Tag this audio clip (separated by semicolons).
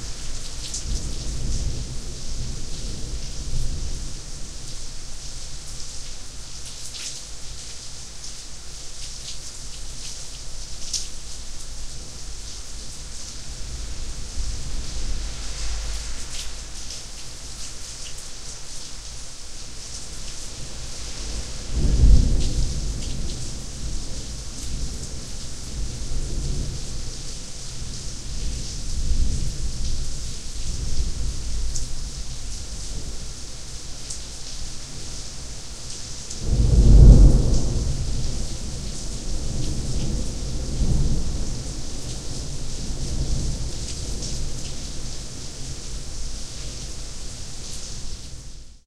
thunderstorm; storm; rain; weather; thunder; thunder-storm; lightning